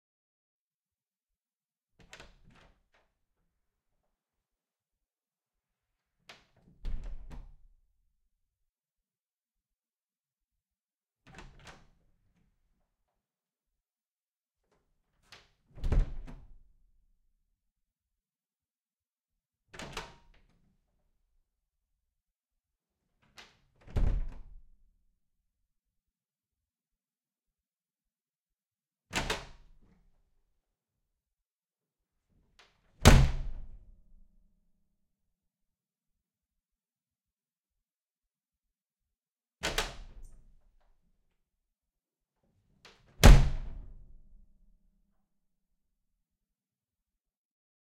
light wooden door opened & closed multiple times
km201(omni)> ULN-2